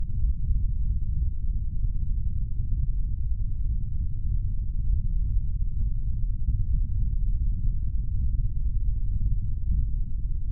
Starship bridge ambience
Sound of perhaps a bridge on a starship. Could be used in multiple ways/scenarios. great seamless loop.
alien, ambience, background, loop, seamless, space